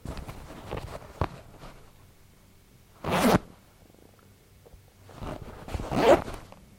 flys unzip zip 001
Flys on jeans being unzipped, then zipped back up.
clothes, fly, flys, jeans, undress, unzip, zip, zip-up